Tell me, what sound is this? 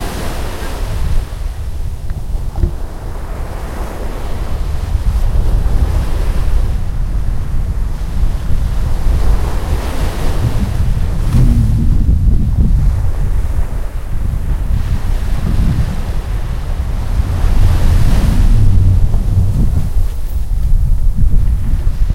Sea&wind
The sound of the sea and the wind, delta of Llobregat. Recorded with a Zoom H1 recorder.
sun, field-recording, Deltasona, morning, spring, sea, nature, beach